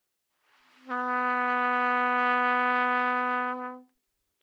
Part of the Good-sounds dataset of monophonic instrumental sounds.
instrument::trumpet
note::B
octave::3
midi note::47
good-sounds-id::2854
good-sounds, multisample, single-note, neumann-U87, B3, trumpet